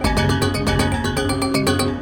kbeat 120bpm loop 4
A slightly ethnic sounding drum percussion loop at 120bpm.
drum-loop, beat, drum, rhythmic, percussion, loop, 120bpm